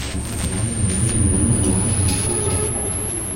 something in the cathedral
atmosphere, baikal, electronic, high, loop